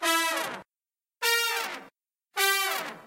My very first upload. Not the greatest, but for what I intend to use it for, it will do nicely :)
Will be using them for many other purposes, methinks...